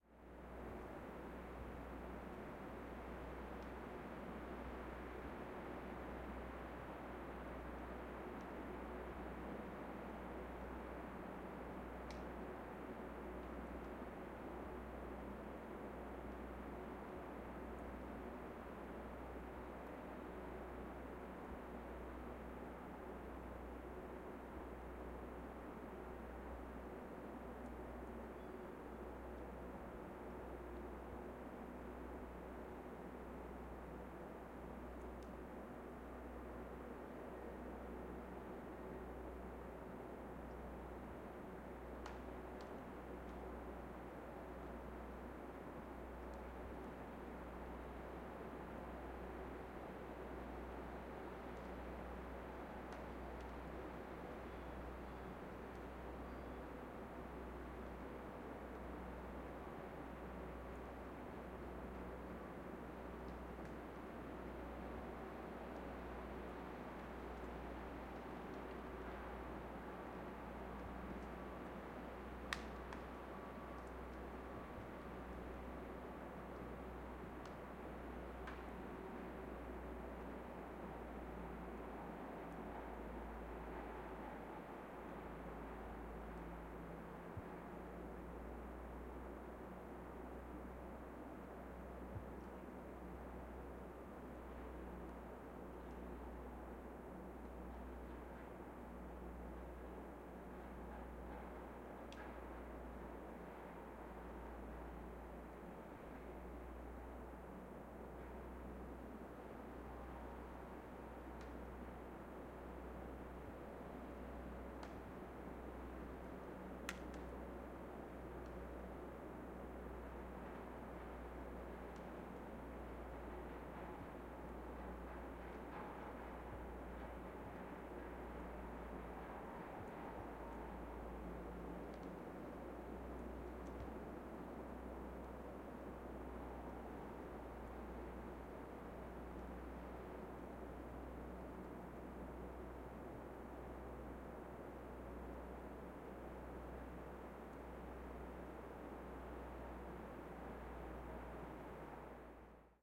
Recording of wind heard through coniferous tress, in residential Banff, Alberta, Canada. Recorded using two microphones, XY, Neumann KMR 81i, sound devices 744 T.